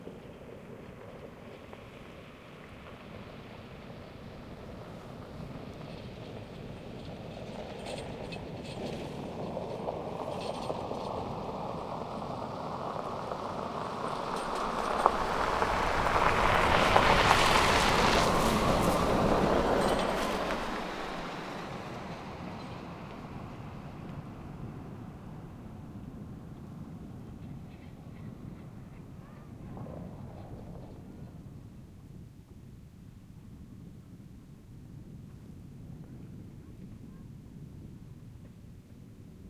truck pickup pass slow gravel crunchy snow